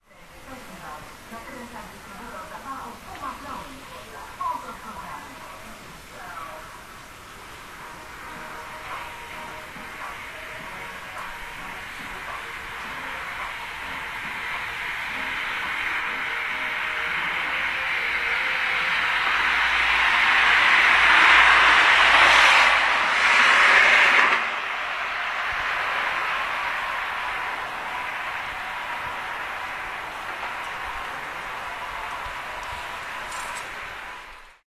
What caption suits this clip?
tv car 280510
28.05.2010: about 23.30. Górna Wilda street in the city of Poznan. The sound of TV adverts that were audible by the open window on the first floor of the tenement. The sound is deafen by passing by car (cobbled street).
more on: